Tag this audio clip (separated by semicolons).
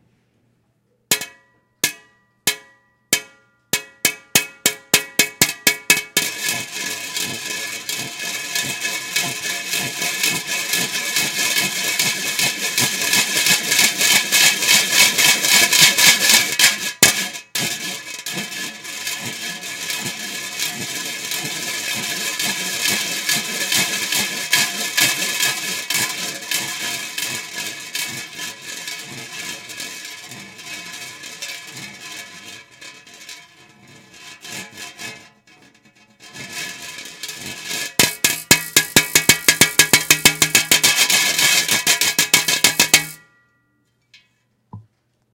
garbage; bottle; plastic; rubbish